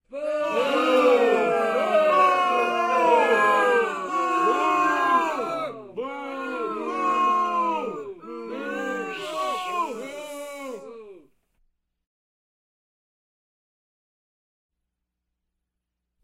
Crowd Booing 3
audience, crowd-boo, people